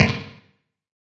Do not expect "instant gratification", though, you will need to experiment with the parameters in order to obtain good snare sounds - which is part of the fun, in my opinion!Please note that the plugin can produce very loud sounds, especially when its parameters are re-set.